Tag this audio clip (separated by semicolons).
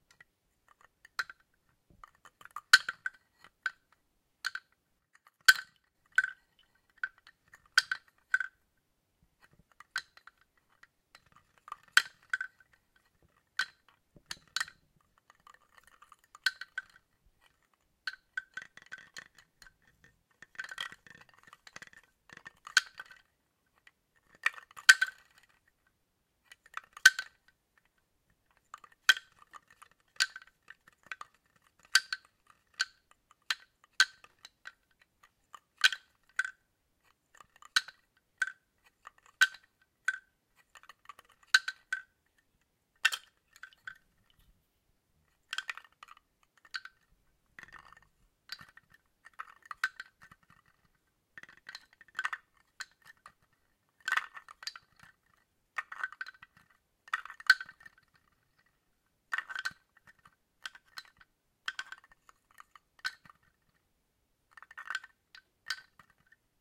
wooden; adpp; mobile; windplay